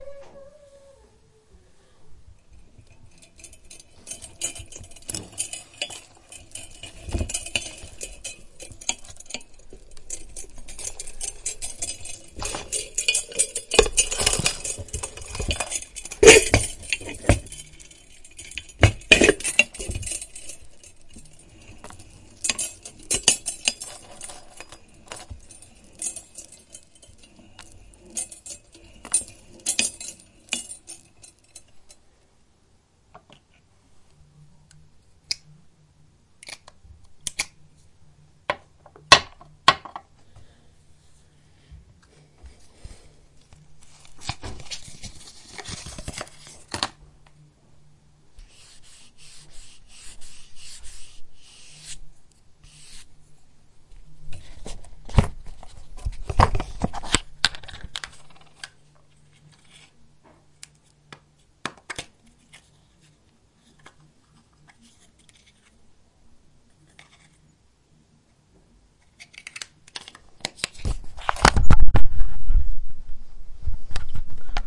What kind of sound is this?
I created this noise by moving around a jewelry holder, it spins and holds earrings. I then moved around things on a vanity table.
jewelry, MTC500-M002-s14, sampler